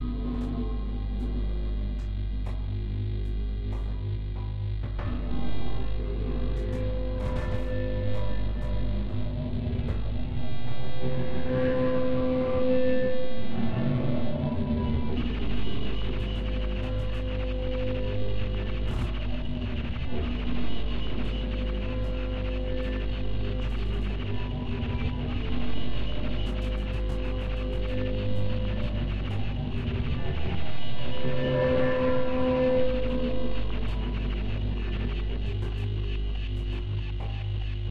Sunny Cities and who they remind me off that I have been too in the past 5 years. Ambient Backgrounds and Processed to a T.
ambient, atmospheres, backgrounds, clip, copy, cuts, distorted, glitch, heavily, pads, paste, processed, rework, saturated, soundscapes, tmosphere, valves